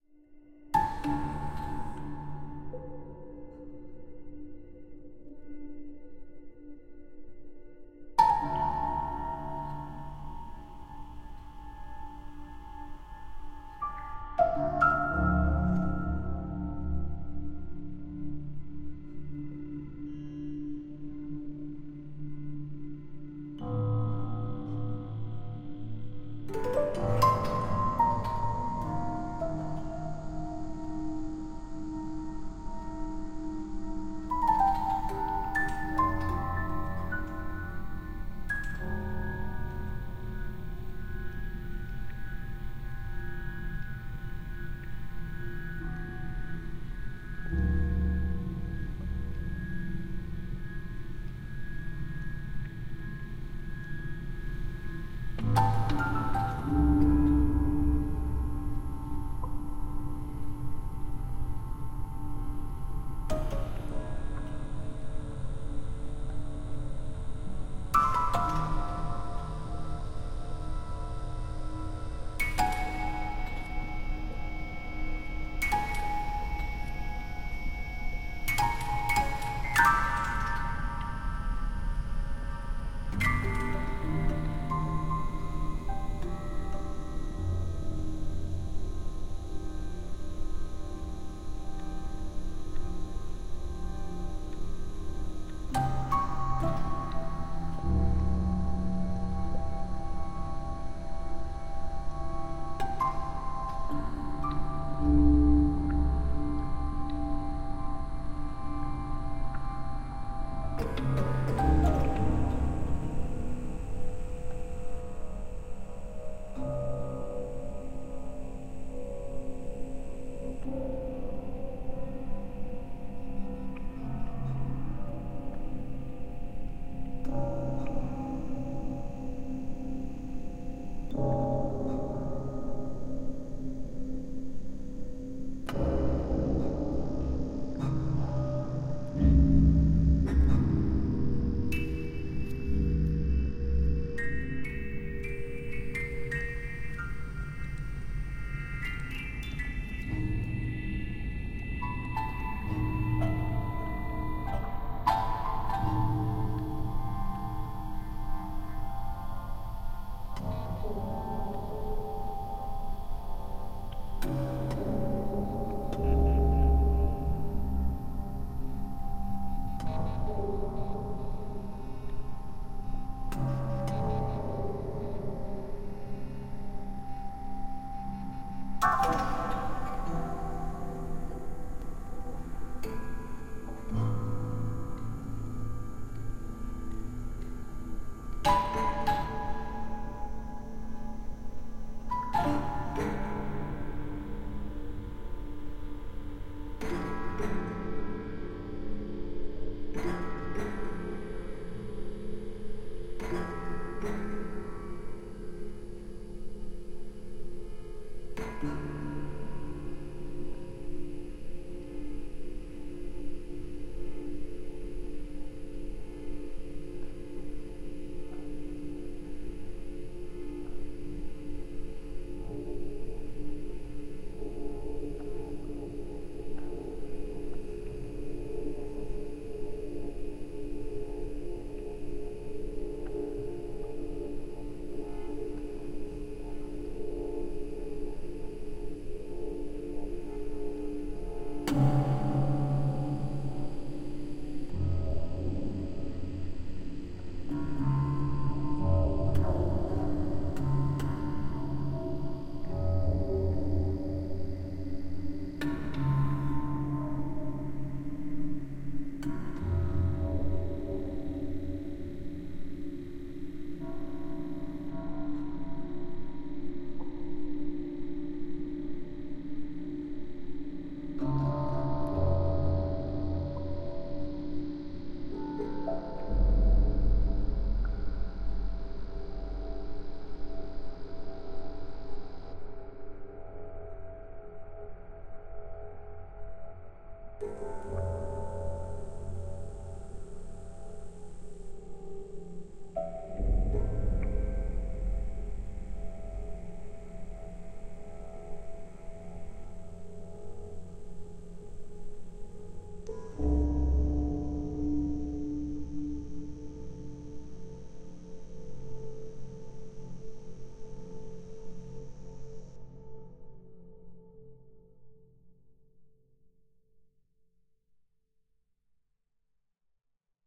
Piano Morte 1
Samples of a prepared piano recorded live to disk in Logic with electronic processing, subsequently edited in BIAS Peak.
detuned, digital-processing, electronic-processing, extended-piano, melodic-fragment, piano, prepared-piano, soundscape